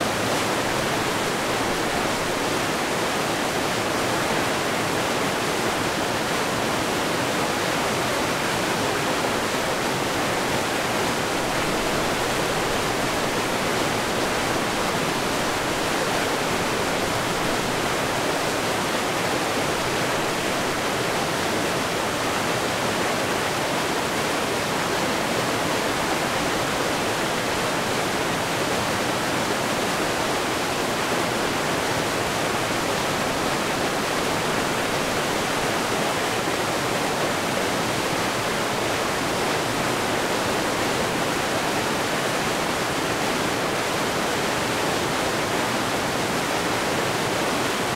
weir CU2
mono recording close up of water thundering down a weir.
from the top of the weir - recorded with a sennheiser ME66 onto a Tascam DR40
river, water, field-recording, weir